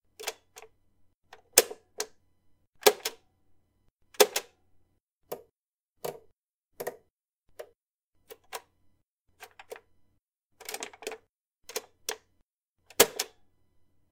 Tape Deck Buttons and Switches
Soft and hard button presses and switch flicks.
Recorded from a Sony CFD-9 Radio/Cassette Player.
button, click, press, switch, tapedeck